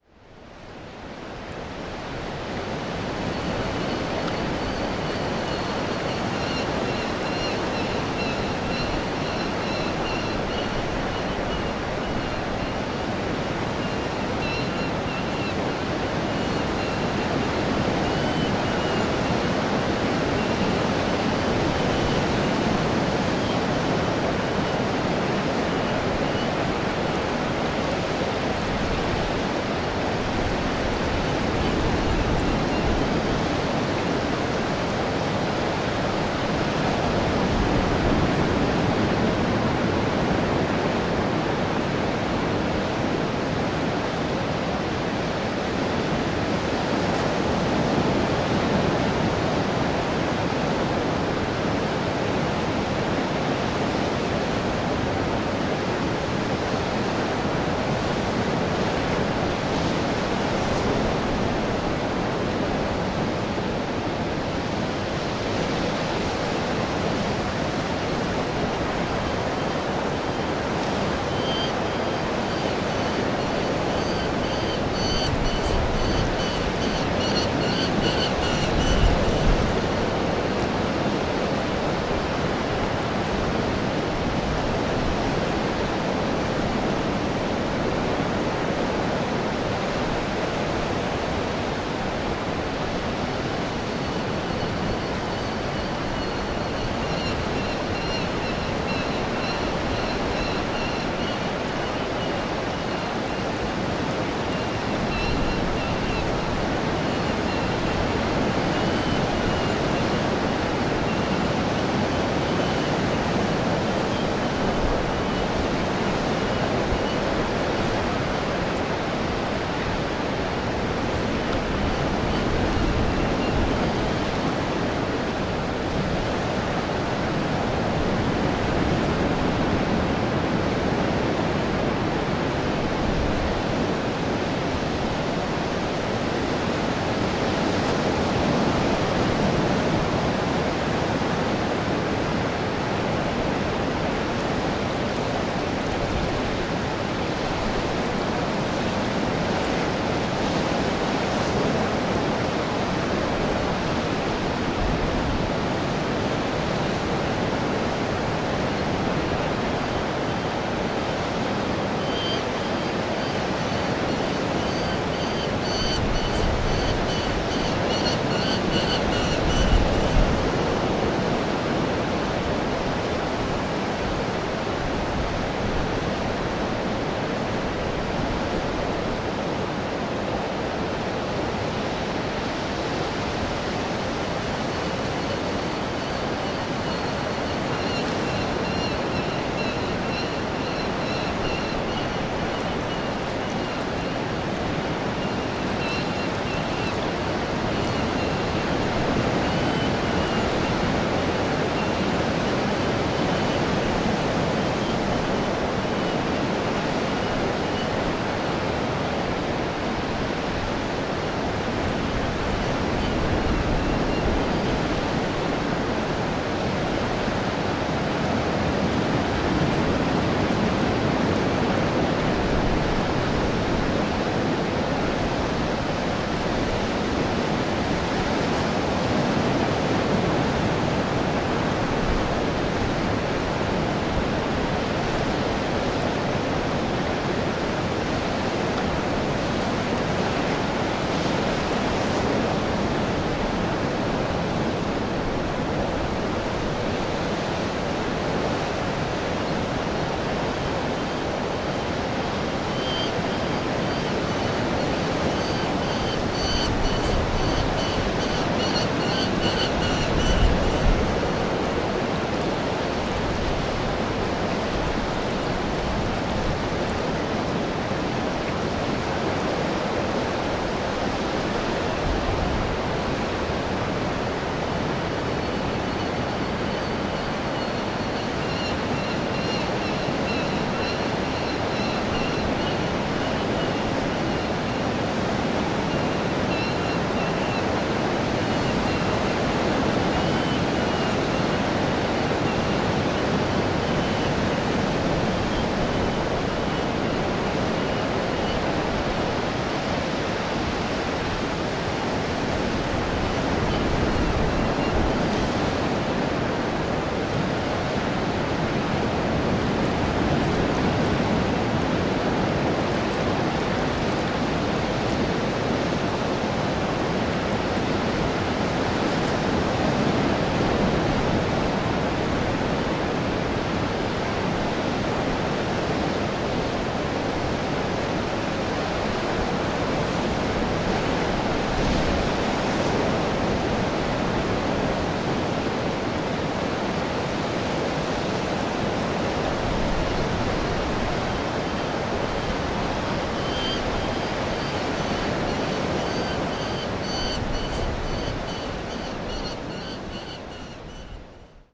03.Peregrines-on-Traeth-Llyfn
Recording on a TraethLlyfn beach in Pembrokshire. Sounds of waves of incoming tide and Peregrine falcons calls.
field-recording, sea, merlin-falcon